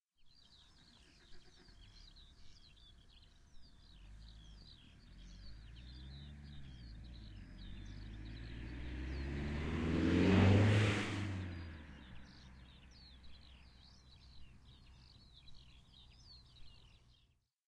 starting the engine and passing bye
Sample recorded with ZOOM H4 in Checiny in Poland. External mics have been placed on the level of the wheels in 7,5m distance from the road.
ambience, car, field, nature, poland, recording, stereo, street